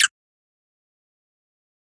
Menu Tick
Crisp slightly wet tick ideal for interface toggle, passive selection or timer tick.